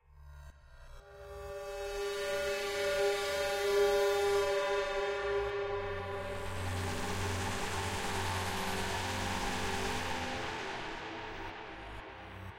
fx drone abl
This sound was entirely created in Ableton Live using Operator synth and a bunch of native effects.